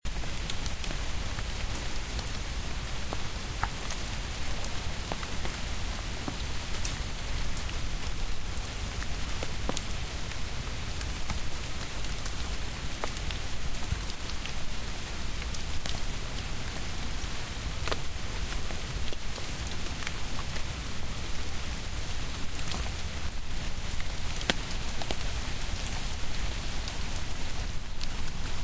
Rain in woods,on fallen leaves. Recorded with H4 Zoom, Nov 2015 at Discovery Park, Seattle Wa,USA